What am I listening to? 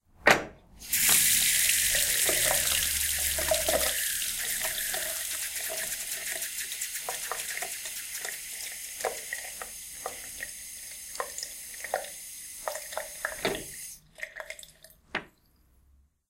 Tap Water 2
A faucet being turned on, and water running in the sink for a while before the tap is turned off again.
bath, bathroom, drain, faucet, h4n, liquid, pour, running, sink, stream, tap, wash, water, zoom